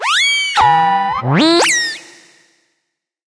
Moon Fauna - 44
Some synthetic animal vocalizations for you. Hop on your pitch bend wheel and make them even stranger. Distort them and freak out your neighbors.
alien, animal, creature, fauna, sci-fi, sfx, sound-effect, synthetic, vocalization